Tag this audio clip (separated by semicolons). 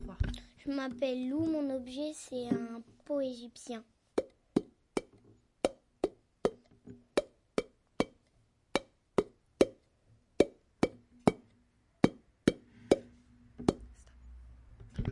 france; saint-guinoux